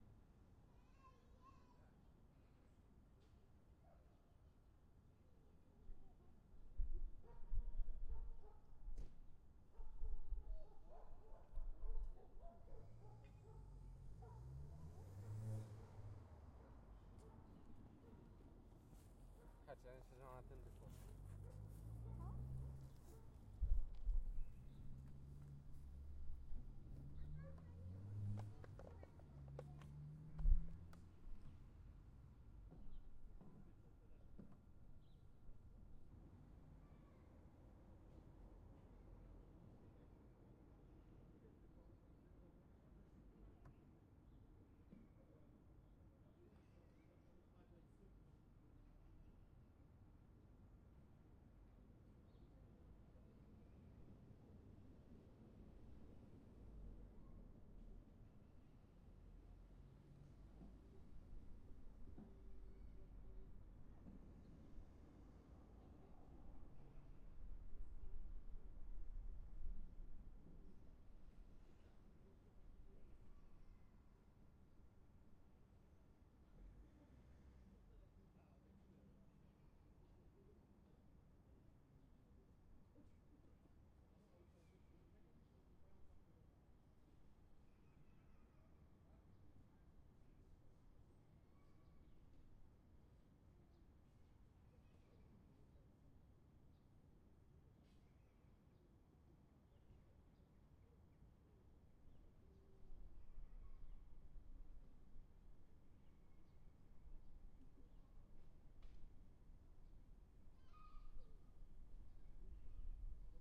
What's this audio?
Skwer Starszych Panów Piątek Popołudniu
Square, the city, the restaurant near the
near, restaurant, city, Square